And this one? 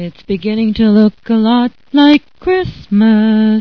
Woman singing: "It's beginning to look a lot like Christmas."
Mono recording with cheap dynamic mike, Sound Blaster 16, Microsoft Sound Recorder.